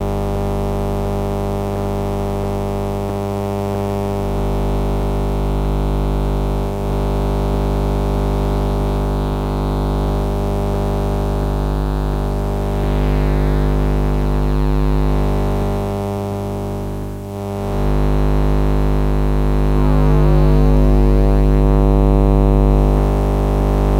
Recordings made with my Zoom H2 and a Maplin Telephone Coil Pick-Up around 2008-2009. Some recorded at home and some at Stansted Airport.
buzz, pickup, coil, field-recording, magnetic, telephone, electro, bleep